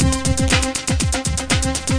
An easy 2 sec electronica loop made with HAMMERHEAD drum machine, with a custom drum pad. Will loop very Well!